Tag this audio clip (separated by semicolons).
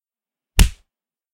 staccato; smack; percussive